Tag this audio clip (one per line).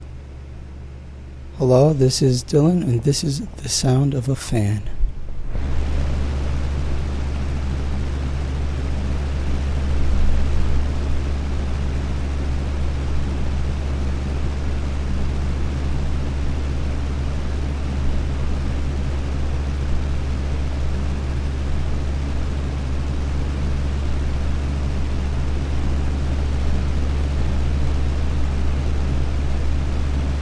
blowing
wind
fan